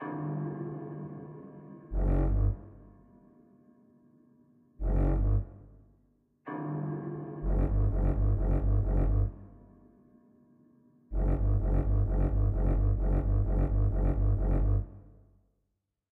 short shark theme made by me CC lic
game, ocean, shark